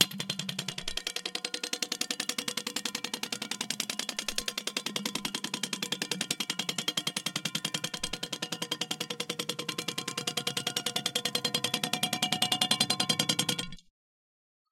Bird Rattle
ZOOM H4 recording of a toy that involves a toy bird on a spring bobbing its head down a thin metal pole.